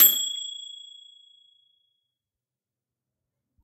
Microwave Ping
Chime noise extracted from a recording of a microwave running. Shorter decay due to noise fade-in after initial chime. Recorded with Voice Record Pro on Samsung Galaxy S8 smartphone and edited in Adobe Audition.
bell, ding, household, kitchen, microwave